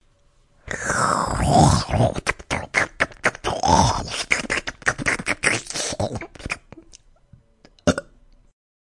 This sound was originally recorded to be a character inhaling raw meat, but it could be used for any number of slurping sound effects. I hope you enjoy because my s/o really hated hearing me record it.
burp, chomp, comedic, comedy, consume, drink, eat, food, mouth, mouth-sounds, sip, slurp